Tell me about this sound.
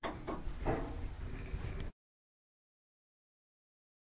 Part of a bunch recordings of an elevator. One of the sounds being me sneezing.
I find these sounds nicely ambient, working well in electronic music that I myself produce.
door, elevator, field-recording